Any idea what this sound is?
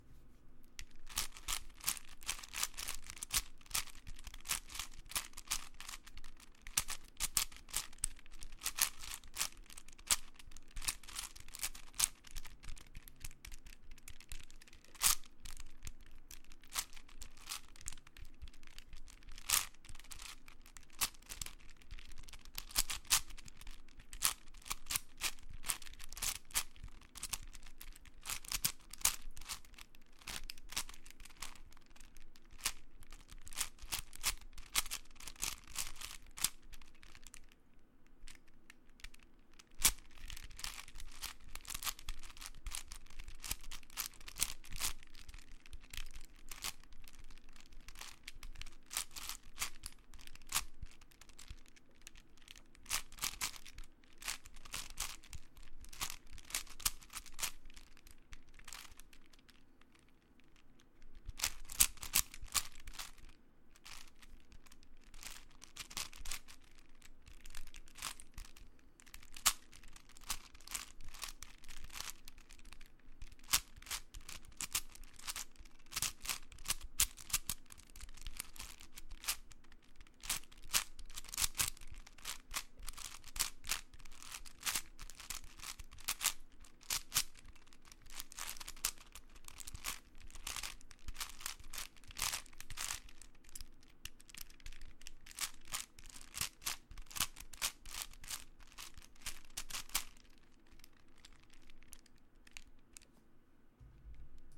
Me doing a quick solve of a 6x6x6 Cube
Rubiks, Click, Crunch, Rubikscube, Puzzel, Cube